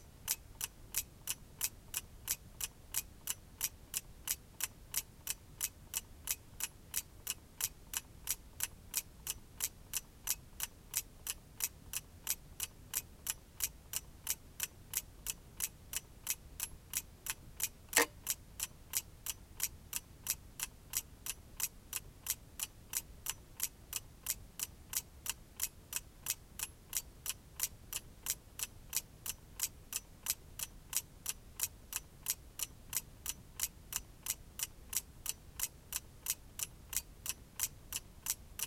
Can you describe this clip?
wuc ticking w bell trigger at 18s
Ticking mechanism of wind-up clock. Clock attempts to trigger the bells to mark the hour at about 18s. The system is broken, but with the additional bell sounds I uploaded, you can recreate it as you wish. Also possible to just edit out the trigger and loop the ticking.
clicking, wind-up, ticking, wind-up-clock